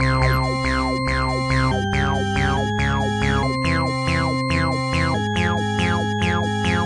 another strange electronic song